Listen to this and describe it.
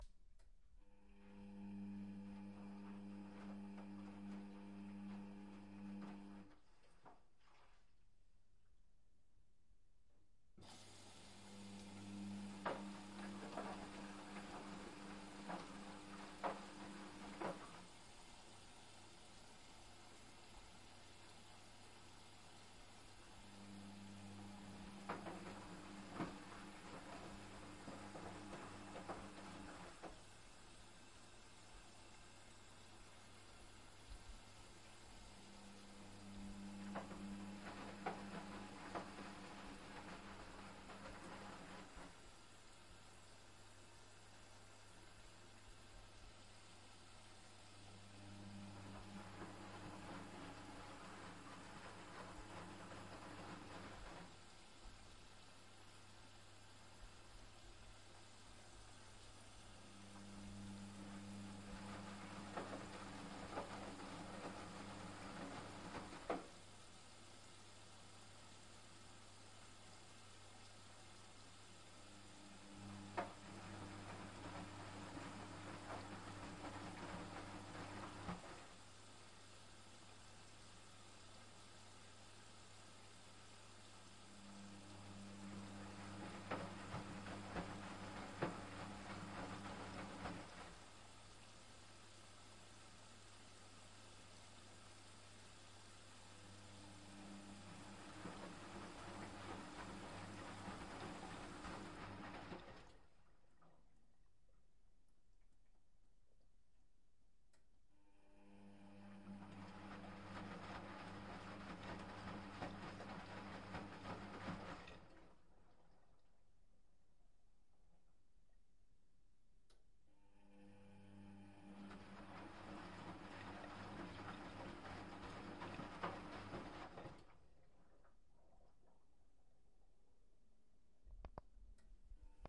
My washing machine going in a regular mode.
Olympus LS 100, Stereo